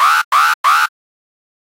3 alarm short c
3 short alarm blasts. Model 3
alarm, futuristic, gui